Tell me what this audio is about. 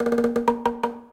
perc-fx-126-01
panning, minimal, house, club